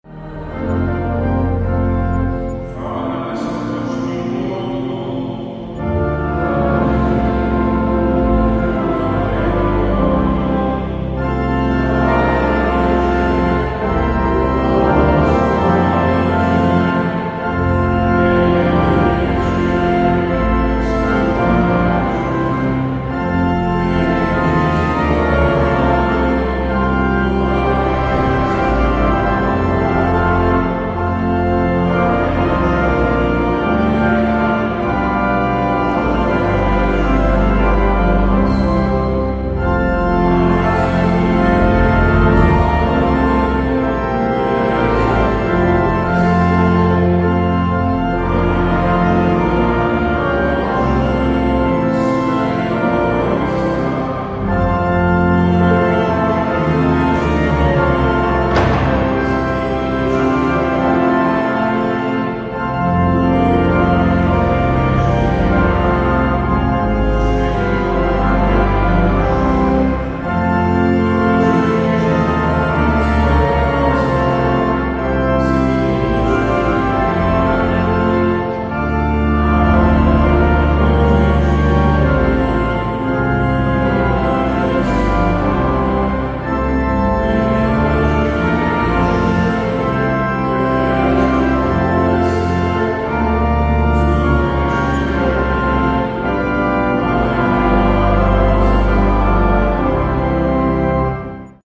polish gloria ,church, organ